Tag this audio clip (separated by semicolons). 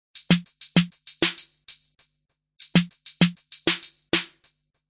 Beat; Drum; DrumLoop; Drums; Electric; Electro; Electronic; House; IDM; Loop; Machine; Retro; Trap; Vintage